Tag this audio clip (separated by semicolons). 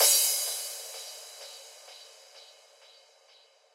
EDM; Electric-Dance-Music; Electro; House